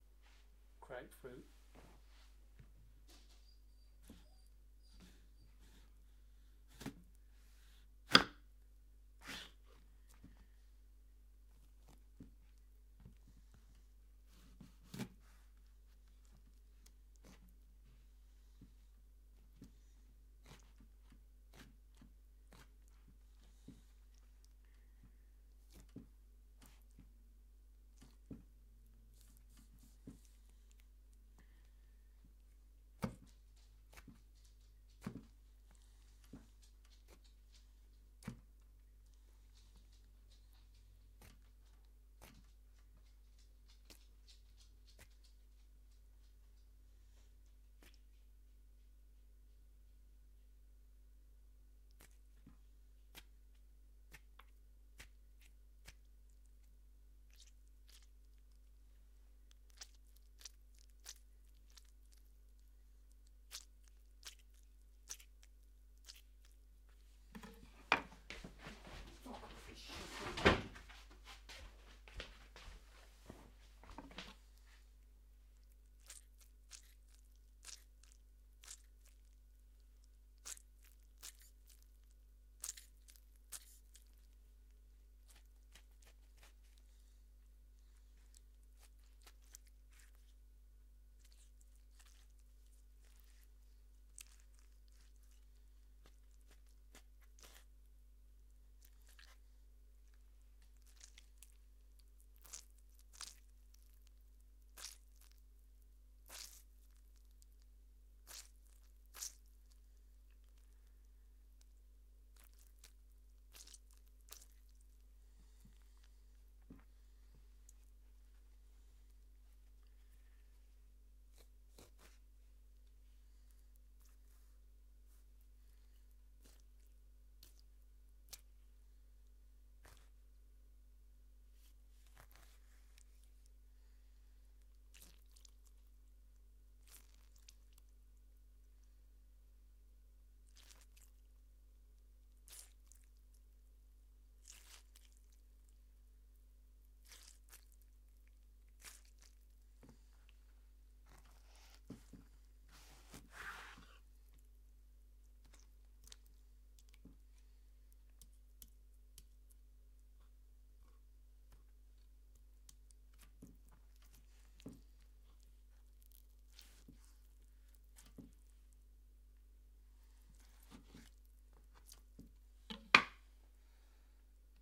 Grapefruit Squish
Sounds of a grapefruit being squashed and played with. Level is a bit low. Recorded with ME66 into Tascam DR40.
fruit, gore, squish, grapefruit, squash, squirt, blood